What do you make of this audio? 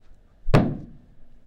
Thump; close
Recording of somebody hitting the ground.